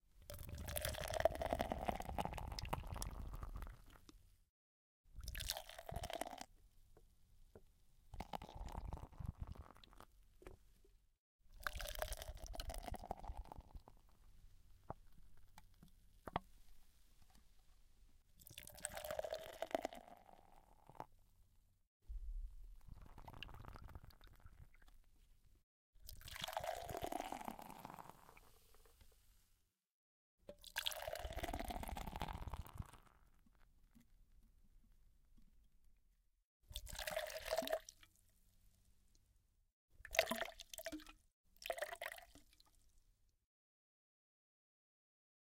pouring can
Recorded two can / tins with beer
soda, drink, beverage, can, aluminum, liquid, glass, pouring, tin